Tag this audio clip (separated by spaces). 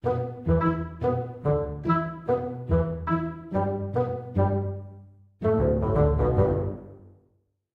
fun infantil samples animado comedia story film infancia cartoons humor comedy dibujos funny